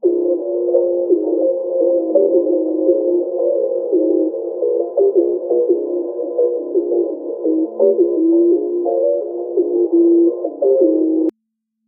cloudcycle-cloudmammut.01
space, evolving, soundscape, divine, drone, ambient